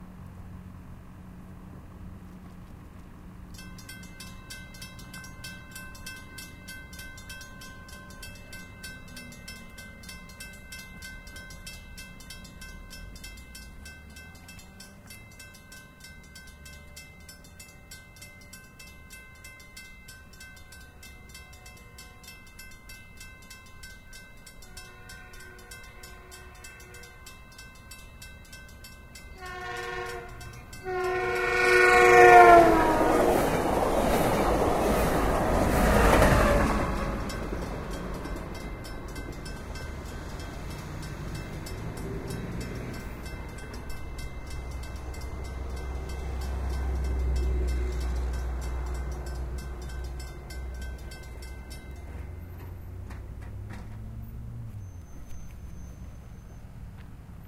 20 feet from a Metrolink train. Gate warning sound/alarm.